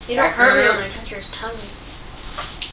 newjersey OC gibberishloop erd
Loopable snippets of boardwalk and various other Ocean City noises.
ocean-city, field-recording